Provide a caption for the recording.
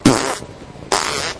fart poot gas flatulence flatulation explosion noise
explosion, poot, noise, flatulation, fart, flatulence, gas